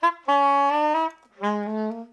Non-sense sax.
Recorded mono with mic over the left hand.
I used it for a little interactive html internet composition:
loop, melody, sax, soprano, soprano-sax